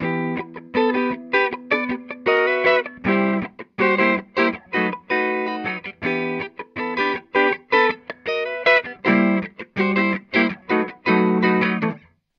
This is a little loop made on Reason 4 by Me :D
128, bpm, dj, drums, electro, electronica, loop, music, qrak, sampled